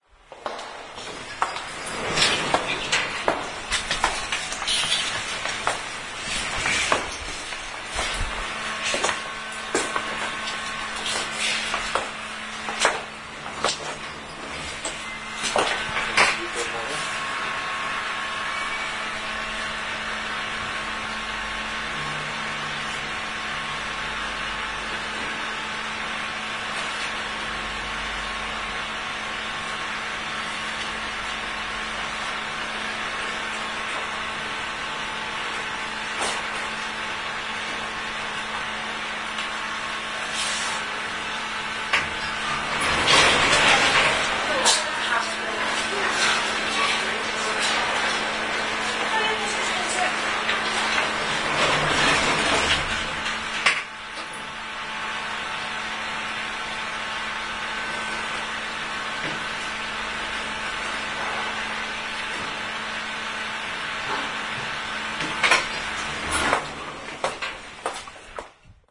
25.10.09: about 13.00, the Stary Browar commercial centre in Poznań/Poland. the elevator: two people inside, you can hear my high heels
elevator, field-recording, highheels, poland, poznan, stary-browar